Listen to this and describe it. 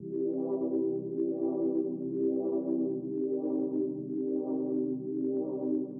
Vocal Synth Loop
Created with vocal sample, into simpler, created with fades and start point and playing two cords, i believe c minor and c major, i wouldn't know any better..
enjoy the loop
Vocal, cmajor, cminor, dance, ears, easy, electronic, fun, loop, loopage, loopmusic, loops, noise, piano, sample, simpler, snyth, sound, trance, vibe